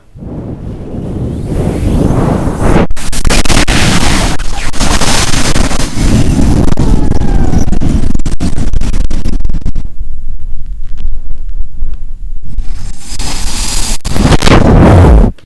The sound of very strong winds.
Achieved by sucking and blowing a mic.